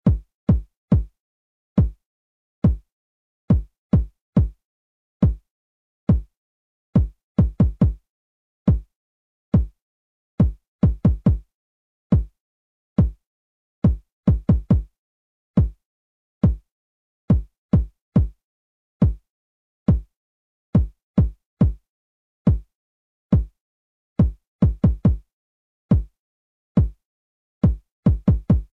AcidMachine Beat Tempo 70
A basic beat using AcidMachine Beta online. Tempo 70.
an interest kick pattern
pattern, 70-bpm, acid, drum, beat, kick